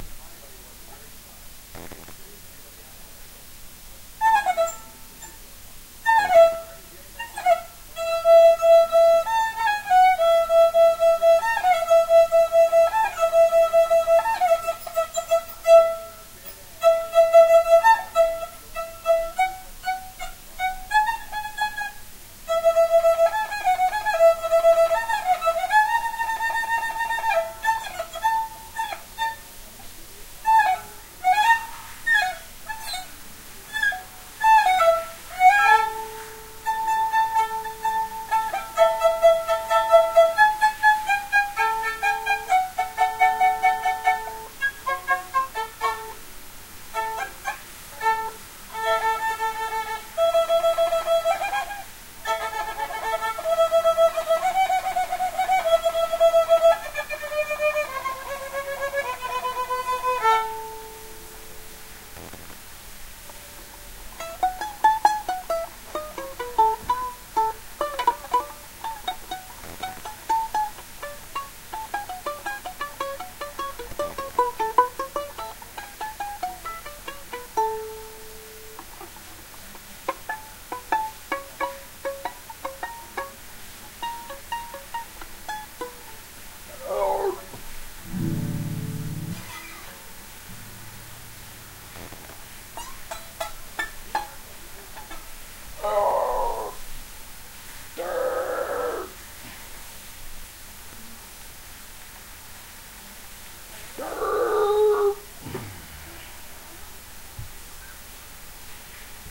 Raw recording of violin doodling recorded with the built in crappy microphones on an HP laptop.